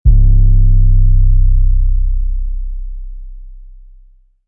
Bass Synth
Bass sample commonly used in hip hop, electronic, and other various genres.